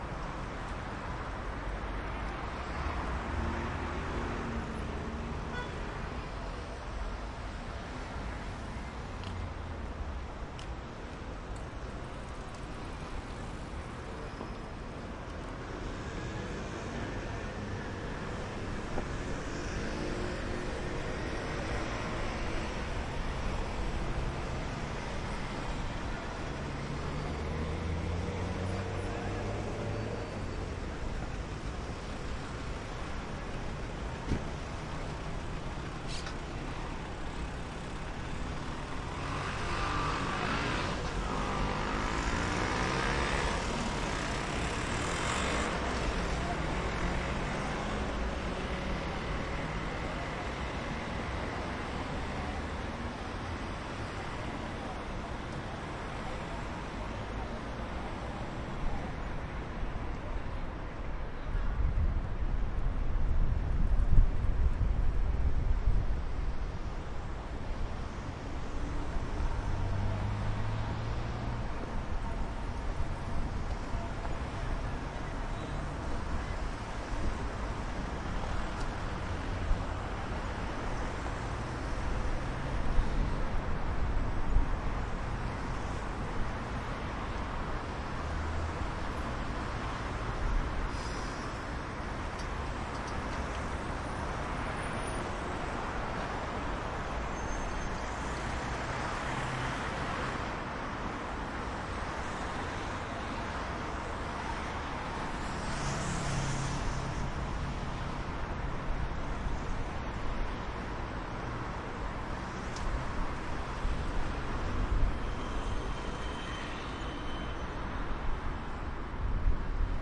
Calidoscopi19 Felip II 2
Urban Ambience Recorded at Felip II / Meridiana in April 2019 using a Zoom H-6 for Calidoscopi 2019.
Humans, SoundMap, Chaotic, Traffic, Congres, Complex, Annoying, Energetic, Calidoscopi19, Construction